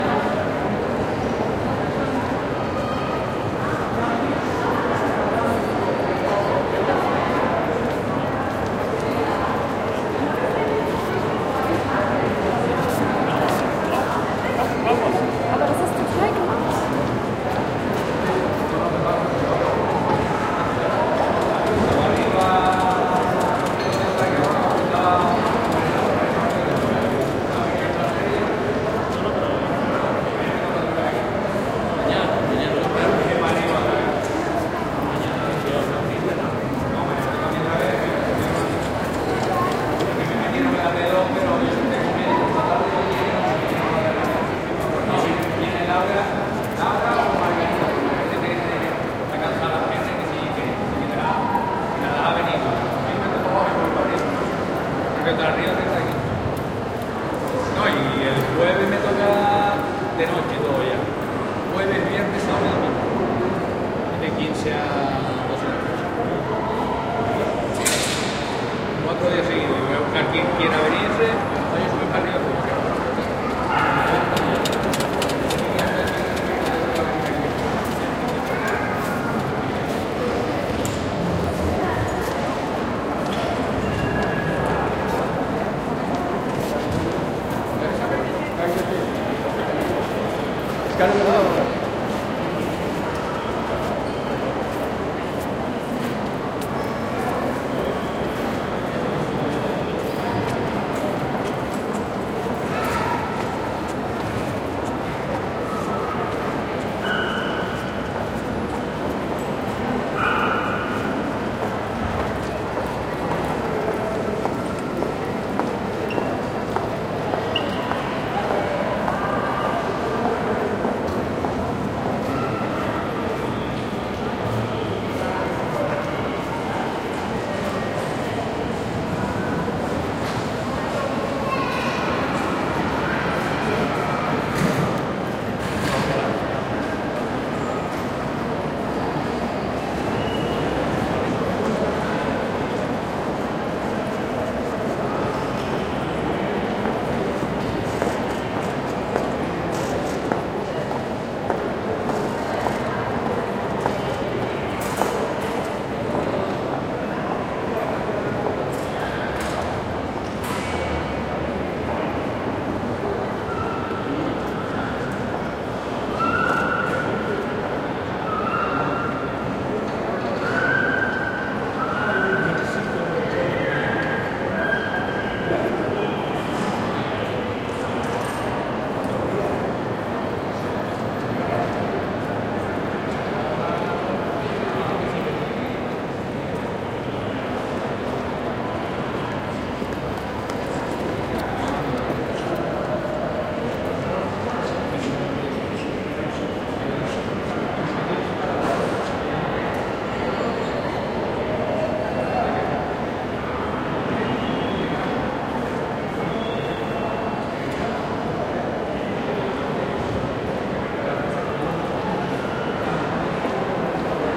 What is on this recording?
SW004 Air Travel Ambience Airport Lanzarote Main Hall Busy
Crowd recorded at Lanzarote Airport. Picked from our exensive Air Travel collection:
crowd, Ambience, waiting-area, Air, Crowds, Airports, field-recording, Passengers, Hall, Airplanes, passage, international, Travel